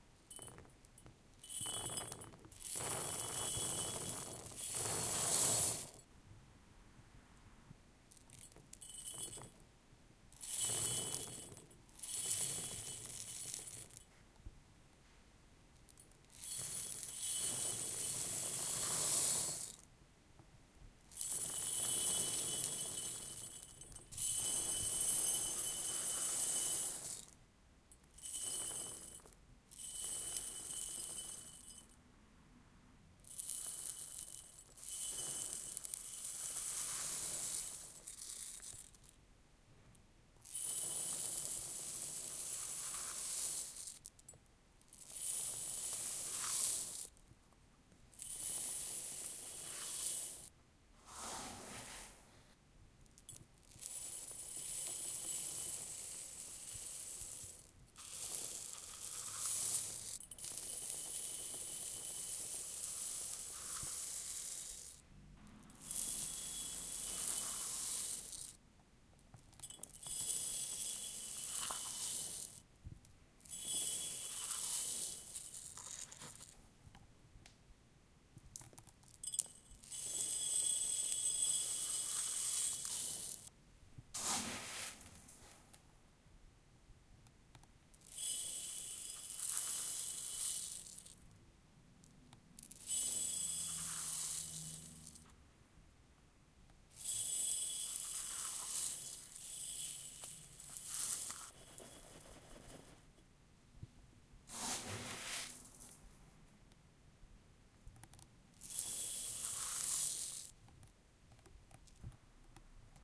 Pouring rice on a clay pot
Pouring heaps of rice on a ceramic pot.
adpp, bottle, ceramic, clay, cup, pottery, pour, rice, sand